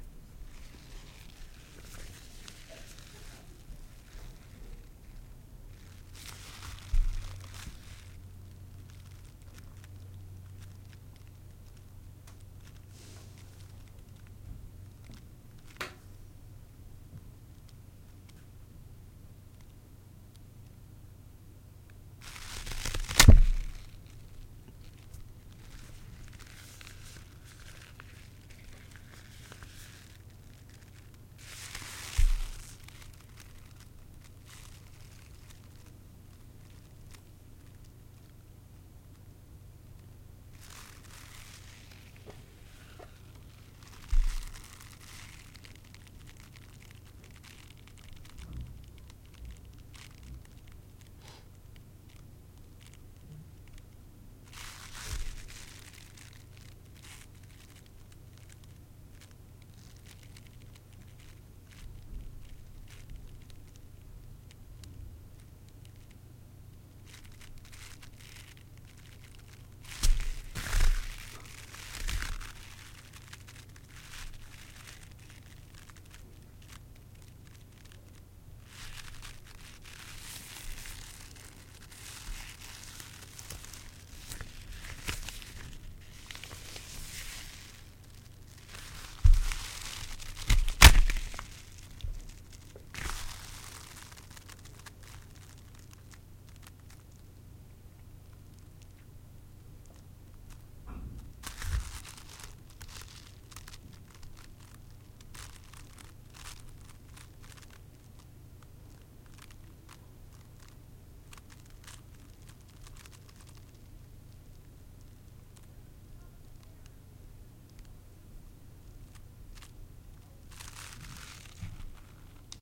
Snapping Rubber Gloves
backdrop, background